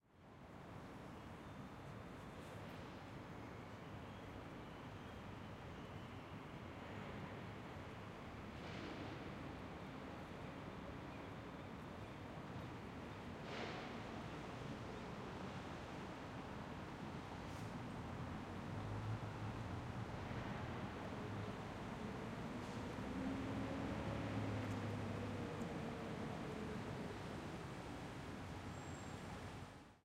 Crowd Noise morning 1
A selection of ambiences taken from Glasgow City centre throughout the day on a holiday weekend,
traffic, H6n, City, Glasgow, Walla, crowd, Street, Ambience, Zoom, people